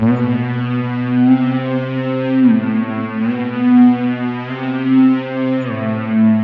TS Synth Chop 150bpm 2
Simple music loop for Hip Hop, House, Electronic music.
electronic, hip-hop, house-music, loop, music-loop, sample, sound, synth-loop, trap, trap-music